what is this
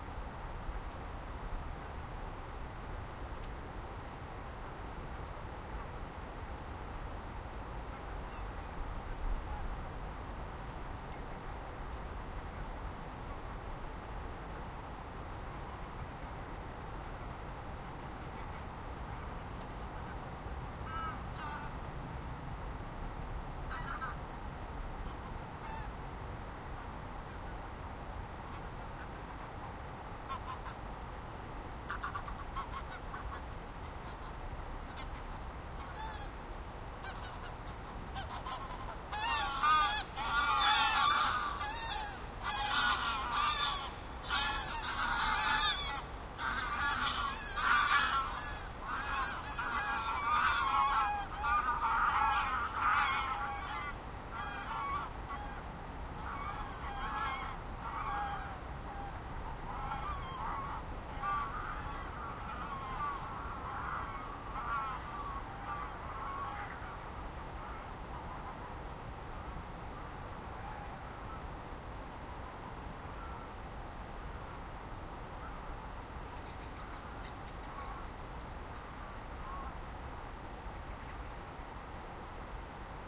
In December these geese past by in the morning from the Loch, just to return s.th. like 8 hours later. This recording was done in the morning, as the birds come from the right.
I used an Iriver iHP-120 recorder plus a FEL micbooster and the soundman OKMs with the A3 device . The microphones were placed on the windowsill.
geese, goose, scotland, field-recording